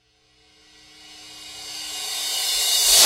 Rpeople RevCrash2
Reversed Crash 2
cymbal, reversed-crash, rpeople